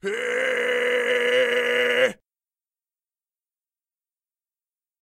Alex-PitchedScream1
Pitched Scream recorded by Alex